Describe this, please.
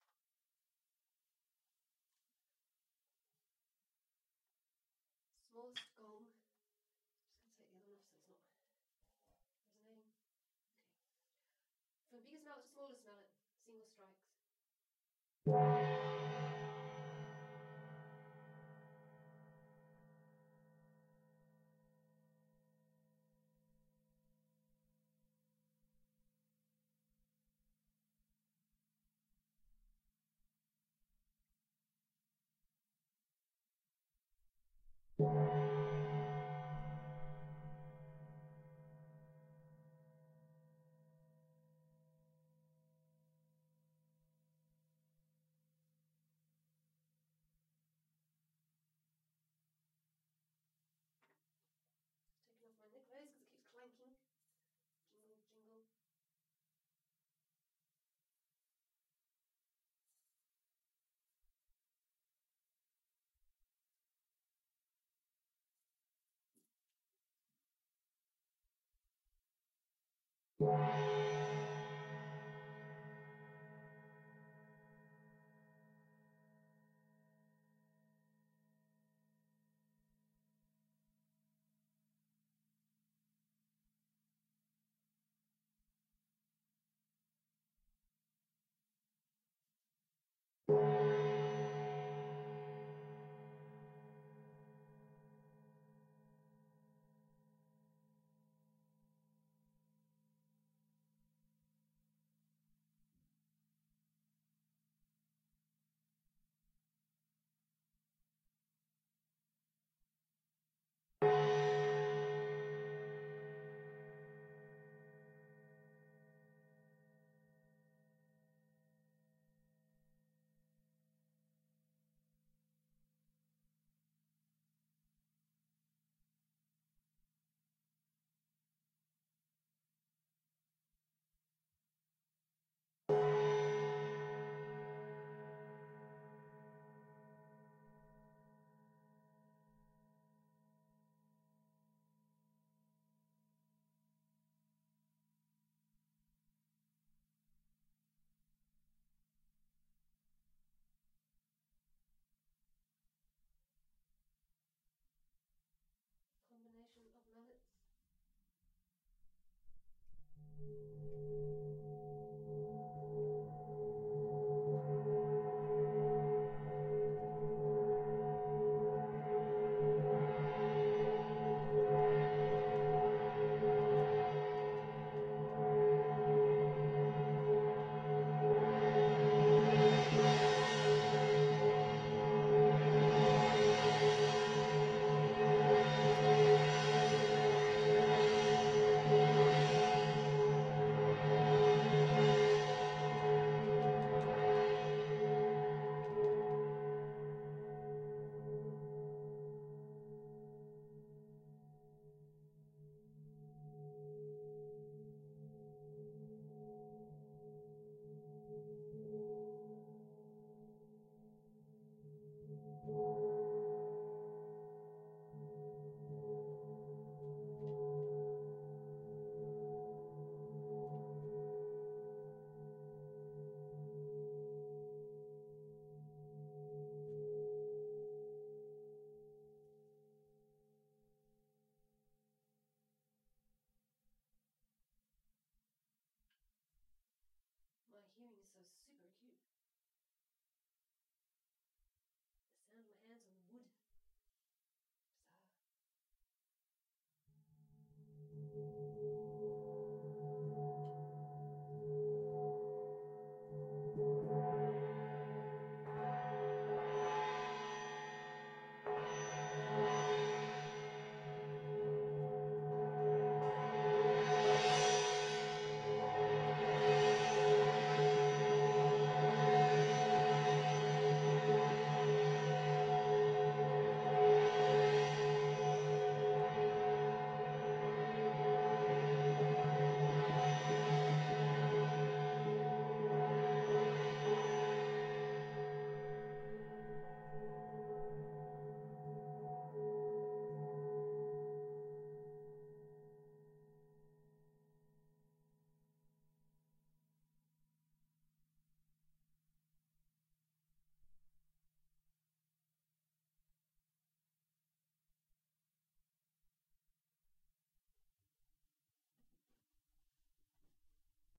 smaller Wind gong, strikes and small crescendo passage
music, wind, gong, healing